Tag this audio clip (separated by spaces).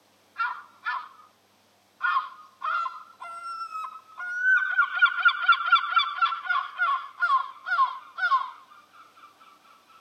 birdsong field-recording